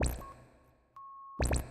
Sine waves processed randomly to make a cool weird video-game sound effect.